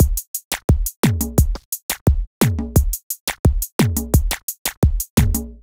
Wheaky 2 - 87BPM
A wheaky drum loop perfect for modern zouk music. Made with FL Studio (87 BPM).
beat, drum, loop, zouk